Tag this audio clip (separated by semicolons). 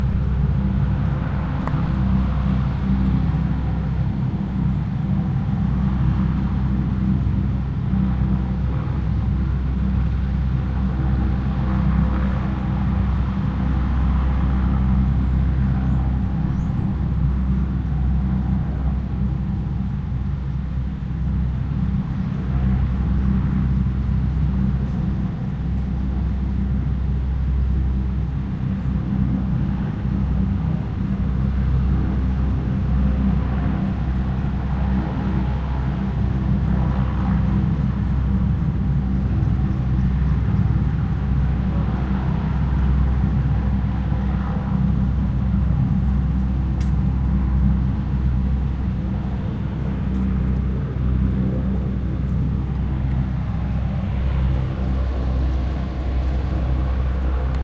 22; Ambient; exercises; field; helicopter; OF; recording; SEQ